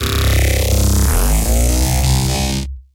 DIA S15 Warped Bass - B (24)
Cutted parts of an audio experiment using Carbon Electra Saws with some internal pitch envelope going on, going into trash 2, going into eq modulation, going into manipulator (formant & pitch shift, a bit fm modulation on a shifting frequency at times), going into ott
EQ-Modulation, FM, Sound-Design, Distortion, Frequency-Modulation, Carbon-Electra, Trash2, Freestyle, Pitch-Shift, Pitched, Manipulator, Frequency-Shift, Formant-Shift